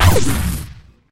A pretty cheesy laser gun sound, created and manipulated from a zipper and sticky-tape and something else - I forget.
scifi, starwars, shoot, lazer, alien, gun, sci, sci-fi, laser, fi